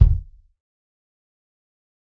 Dirty Tony's Kick Drum Mx 038
This is the Dirty Tony's Kick Drum. He recorded it at Johnny's studio, the only studio with a hole in the wall!
It has been recorded with four mics, and this is the mix of all!
pack, dirty, realistic, tony, punk, tonys, kit, drum, kick